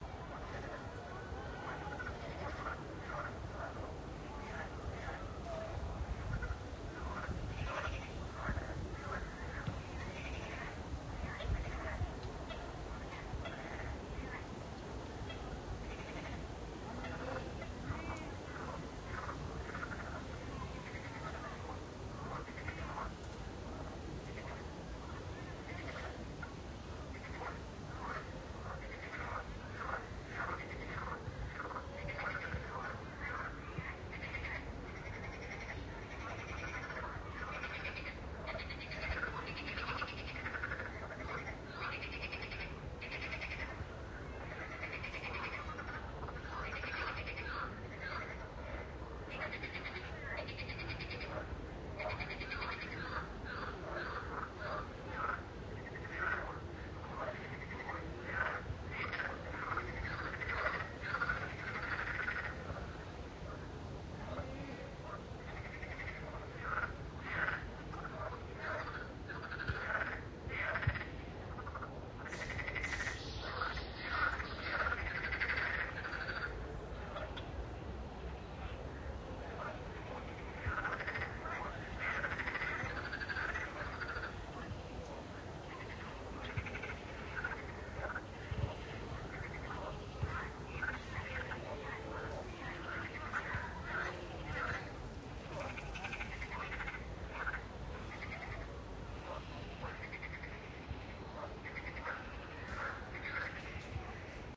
Some frogs as I recorded them on Sunday the 3rd of April 2022 at the park of Athalassa in Nicosia, Cyprus.
Some voices can be heard at the background, such as children playing etc.
Recorded with the iphone xs and the just press record app.
afternoon, Spring, ambient-sound, Cyprus, park, field-recording